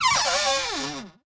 Grince Plac Lg Hi-Lo 4
a cupboard creaking